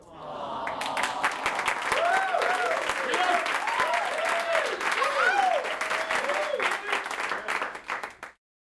symp-applause 2
Group of people saying "awwwwww" followed by supportive applause
According to the urban dictionary: "Awwww" is used to express a sentimental reaction to warm fuzzy experiences. (The number of W's at the end is arbitrary, but at least two or three normally occur in this word.) Also used as an expression of sympathy or compassion.
applause, sympathy, crowd, group, human